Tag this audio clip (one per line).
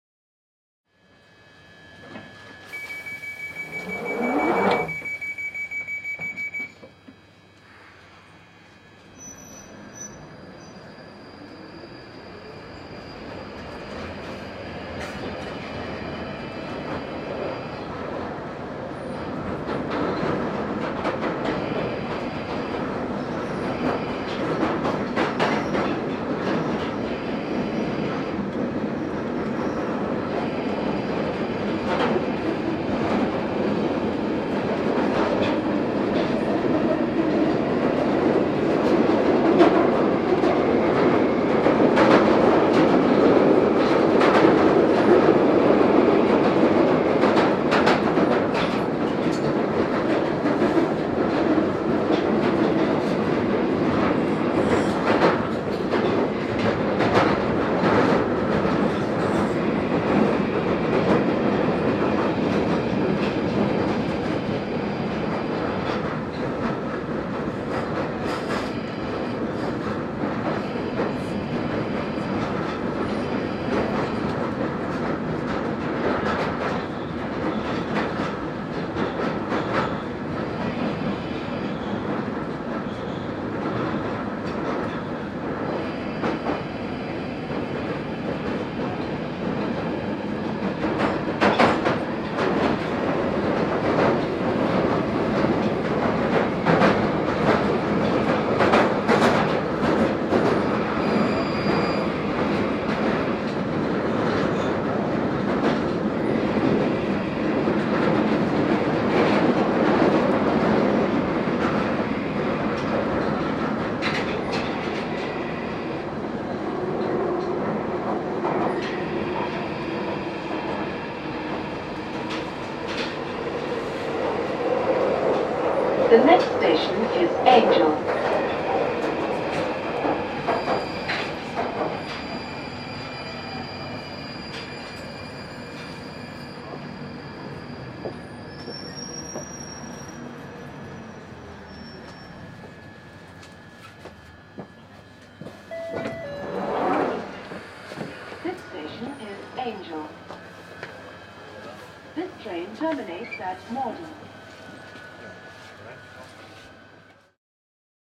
Doors
field-recording
interior
journey
London
London-Underground
Subway
train
Travel
tube
tunnel
Underground